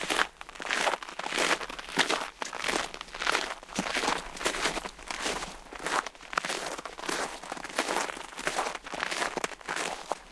Snow footsteps 2
Two people walking on road with frozen snow.
Scottish Borders 2009
Sony ECM MS907 and Edirol R-09HR
Christmas, December, hiking, ice, Scotland, snow, wild, wilderness, winter